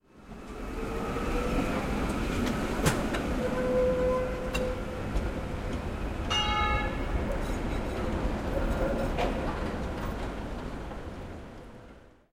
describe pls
Typical bell signal of tram in Antwerp, Belgium.